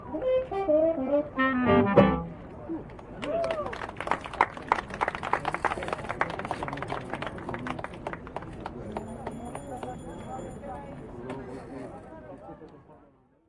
The end of a jazz song played by the buskers on Charles Bridge, Prague with general street ambience. Minidisc recording June 2007. Part of my Prague field recordings sample pack.